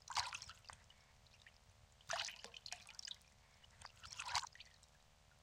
Shaking water with the hand